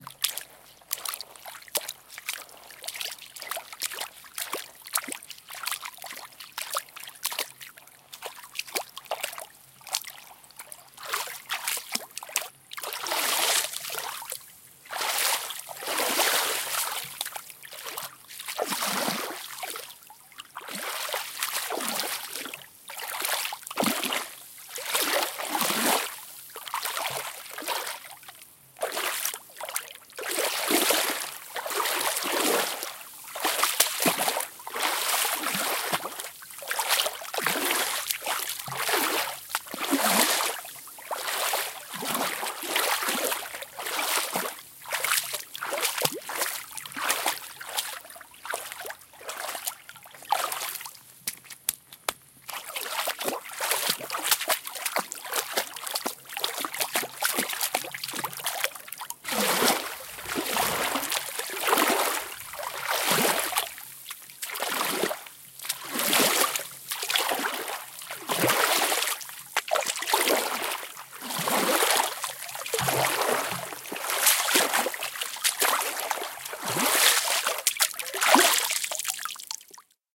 footsteps in the water
slush, splash, fluid, rain, wet, river, mud, drip, mush, liquid, flow, sludge, walking, walk, puddle, water, footsteps, dripping